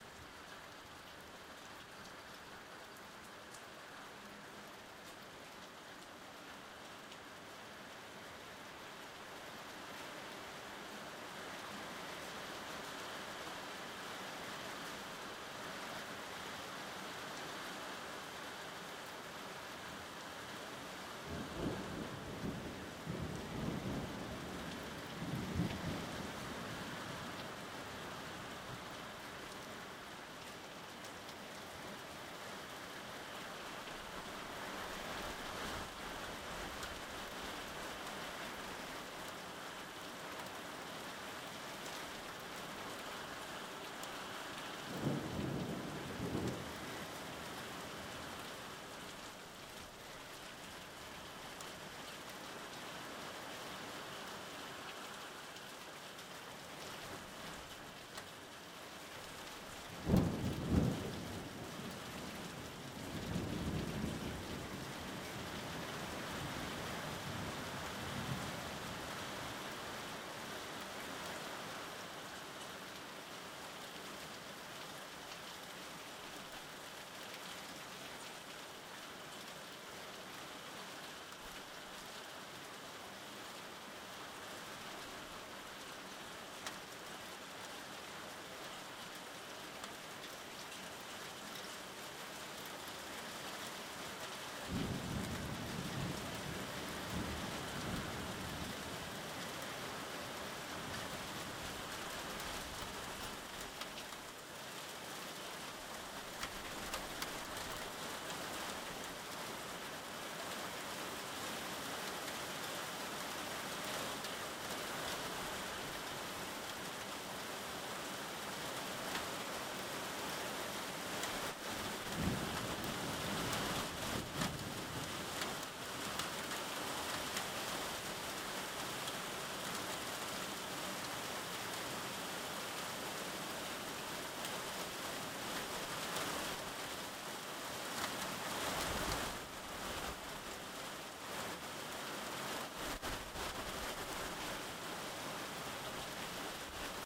ambient sound of rain and thunder